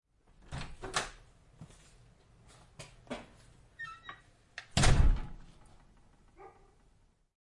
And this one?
open heavy door step out close door muffled dog bark
A person opens a heavy wood door, steps through, slams the door shut, and from outside we hear a muffled single bark from the dog next door.
Hear all of my packs here.